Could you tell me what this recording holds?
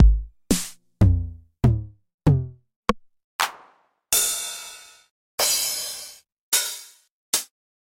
909 drum kit emulation